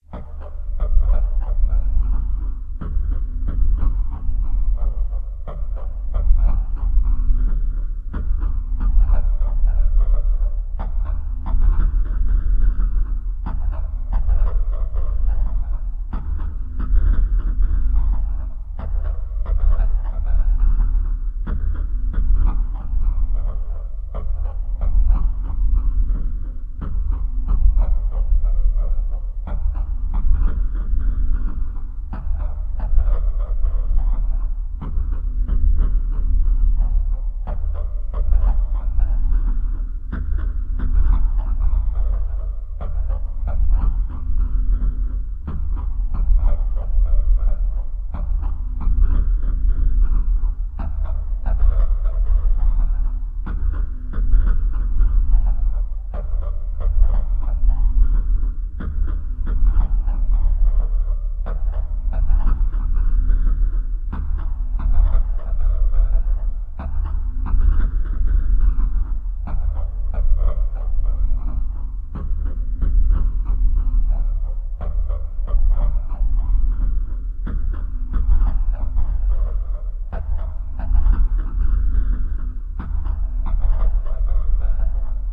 Modulaat Sector (Hollow Restructure)
3 oscillators, delay, reverb, stereo enhancing filter, compressor.
Created with Psychic Modulation (Aethereal)
Mixed in Audacity
ambiance, ambient, black, cavern, cavernous, dark, darkglitch, darkness, dismal, dull, dusky, effect, evil, fx, glitch, gloomy, modulate, odds, osc, raw, restructure, scorn, sector, shape, similar, sorrow, soundscape, wave